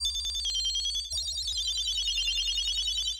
Tech beep synthesized with computer